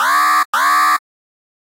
1 alarm long b
2 long alarm blasts. Model 1